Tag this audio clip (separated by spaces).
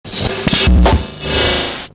analog glitch lofi noise warped